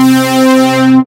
This is just a really small selection of one of the "Synth Orchestra" sounds (probably Synth Orchestra 2?)